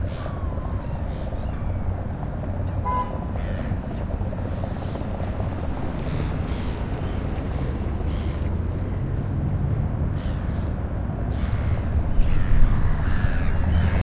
cars near city river